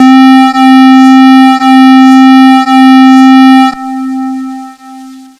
51 synth-reed tone sampled from casio magical light synthesizer